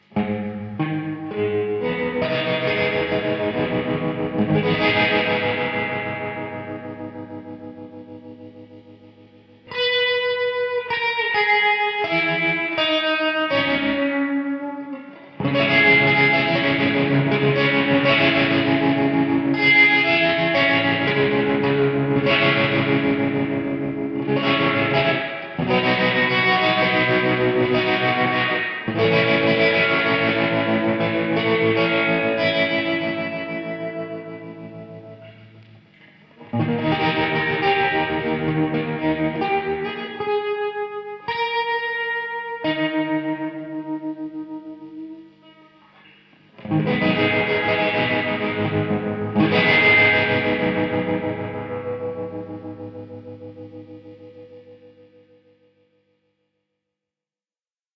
Electric-Guitar Noise Melody
This is an experimental electric guitar track, where I using different effects: overdrive, tremolo, and reverberation. Also, I creating a smooth transition between frequency in channels. Released some interesting atmosphere-ambient lo-fi track, Well suited as a soundtrack, or maybe you can use it in your music projects, podcats, or something like that. How your imagination will be can.
The sequence of chords: G#m, C#m, H, A, D#, G#m.
Tempo is free.